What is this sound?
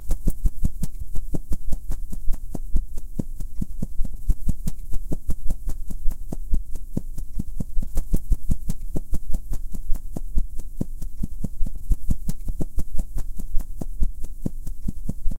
wingflap fast-2
Simulated wing flaps of a bird or other creature created by waving a heavy bath towel and then speeding it up and adding a bit of EQ. Recorded with a Sterling Audio ST51 condenser mic.
bird, flapping, flying, simulated, wing-flaps, wings